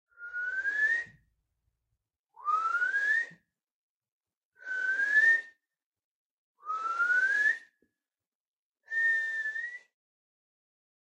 whistle, blow, wind
blow, whistle, wind